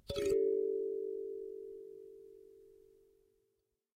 Kalimba (roll 1)
A cheap kalimba recorded through a condenser mic and a tube pre-amp (lo-cut ~80Hz).
Tuning is way far from perfect.
piano, thumb, thumb-piano, ethnic, kalimba, instrument, african